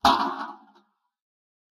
hit box 02
Sound effect for hitting a box with a sword, creating by bumping a plastic bin with the fist and some editing. This sound was recorded with a Sony PCM M-10 and edited for the Global Game Jam 2015.
box, computer-game